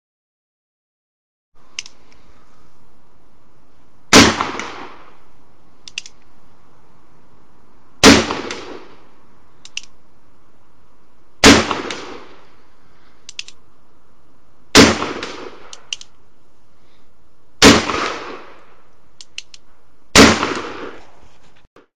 44 black powder
Six shots from a Rogers & Spencer black powder revolver. The clicks are the hammer being cocked; the sharp "thwack" after each report is the sound of the lead ball penetrating the wooden target holder and entering the sand butt!
cal, report, black, revolver, bang, pistol, gunshot, powder, 44, explosion, gun, remington, 1858, shooting